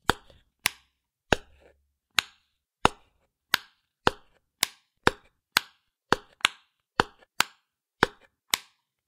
Opening Closing Container
Sounds of opening and closing a small container
Closing, Container, Mus152, Opening